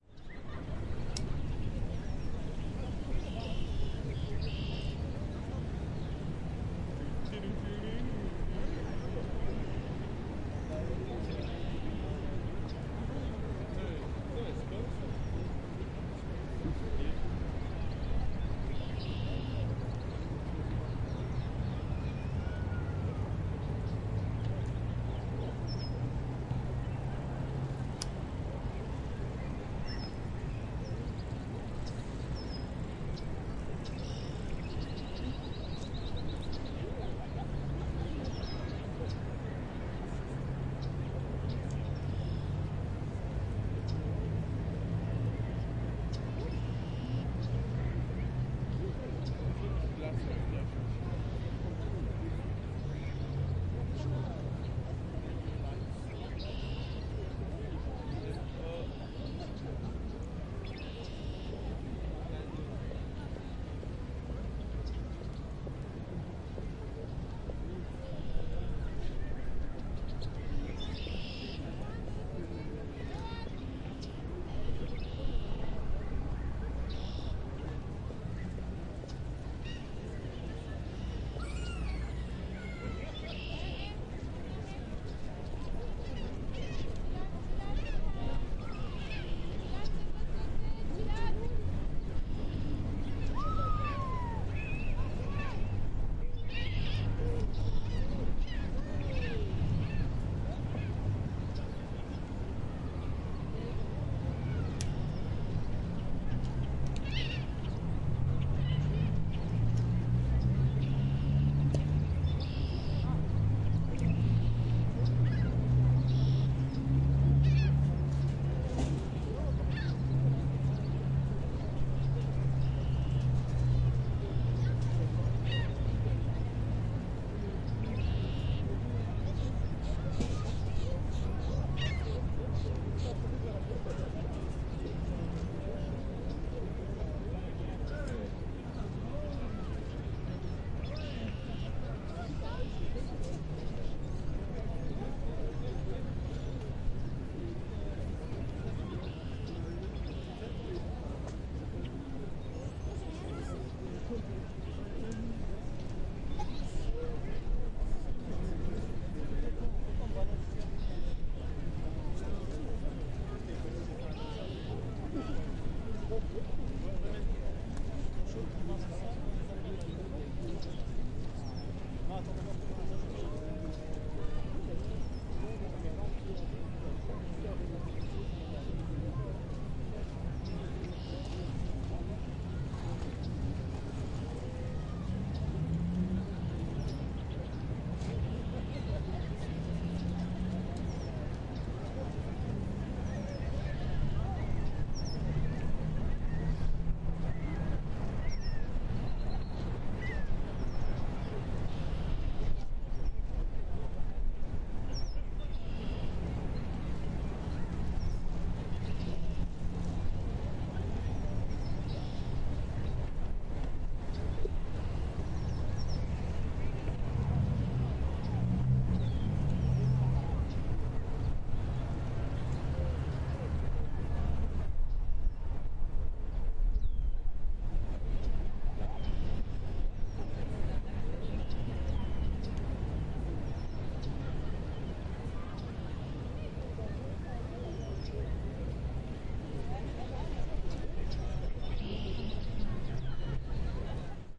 Jarry Park, Montréal, QC
Jarry Park, May 2018.
General ambience.
quebec, canada, city, din, birds, cars, park, kids, montreal, jarry-park, ambience, field-recording, spring, atmosphere